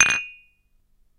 tea cup06-rattle
Small tea cup quickly placed on a table and rattling as a result (shorter version).
Recorded in a basement book and document storage room. Recording hardware: LG laptop, Edirol FA66 interface, Shure SM57 microphone; software: Audacity (free audio editor).